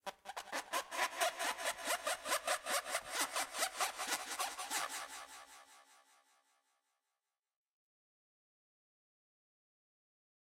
mp titla pinfu tengu
processed, squeak